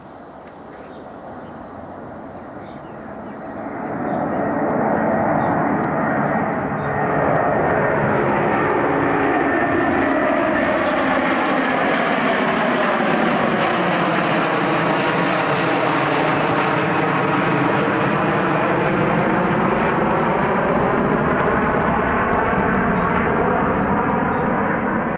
An Airbus A330 powered by RR Trent 700 engines taking off from Heathrow runway 27L